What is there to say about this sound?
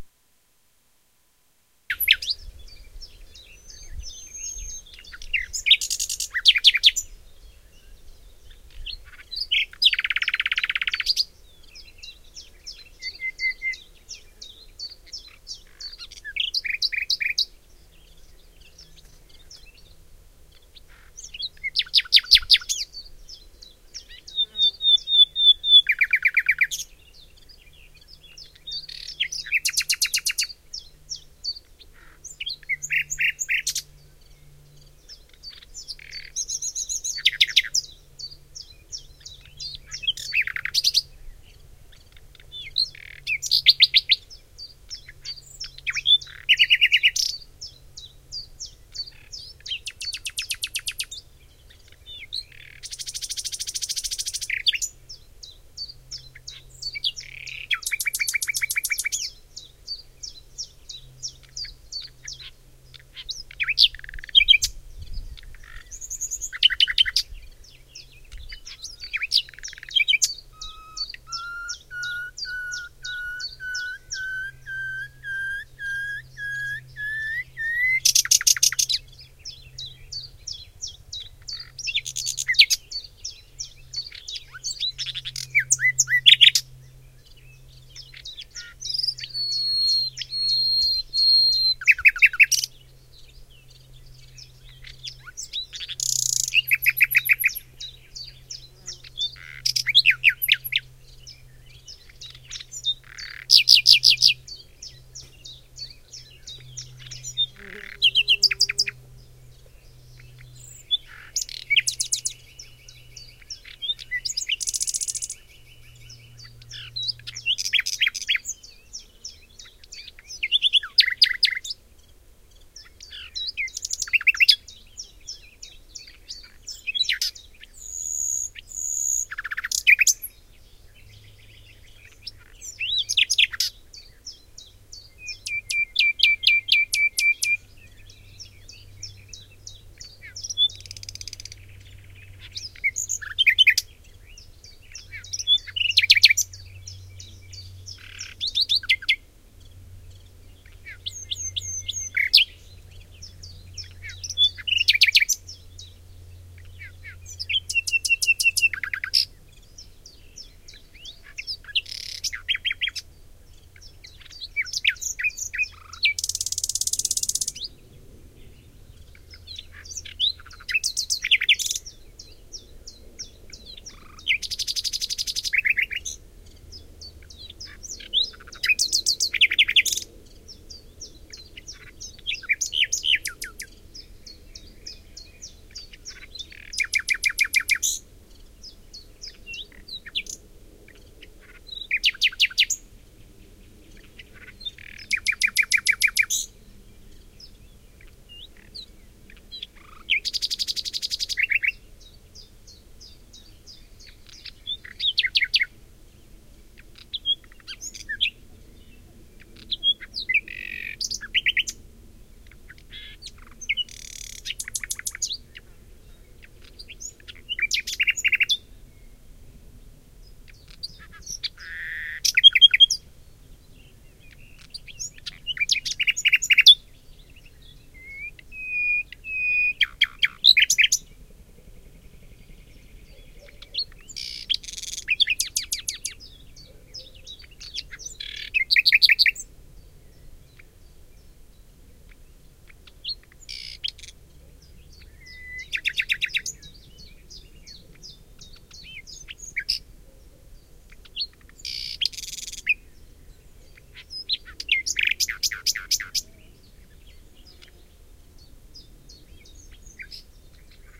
Nightingale song 3
The most beautiful nightingale recording I´ve ever made. Forest near Cologne, Germany,June 2004, Vivanco EM35 on parabolic shield with preamp into Sony DAT-recorder.